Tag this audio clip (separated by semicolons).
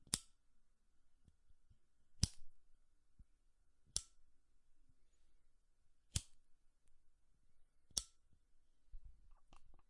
click; fire; flick